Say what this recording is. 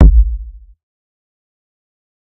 SemiQ kicks 1.
A small mini pack of kicks drum kick kit
drum, drumset, kit, pack, percussion, set